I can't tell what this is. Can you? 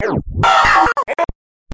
a processed scream from fruity loops.
break, glitchy, panda, processed, scream, vocal